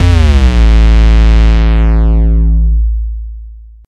Jungle Bass Hit A0
Jungle Bass [Instrument]
Bass, Instrument, Jungle